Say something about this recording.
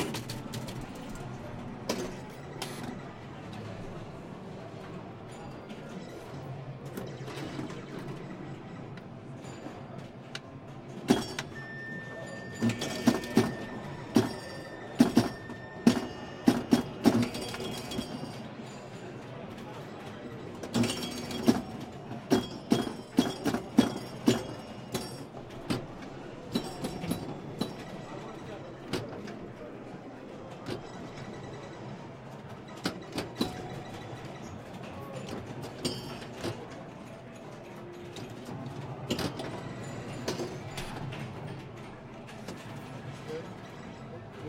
Pinball Hall Of Fame 5
Sounds from the Pinball Hall Of Fame in LAs Vegas.
arkade, game, pinball